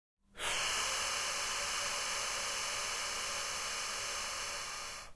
brth solo3 tmty2
Just some examples of processed breaths form pack "whispers, breath, wind". This is a granular timestretched version of the breath_solo3 sample.
processed, shock, shocked, suspense